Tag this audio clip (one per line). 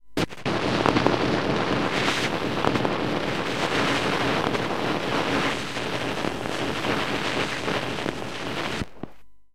Record
Glitching
Noise
Player
Vinyl
Glitch
Electronic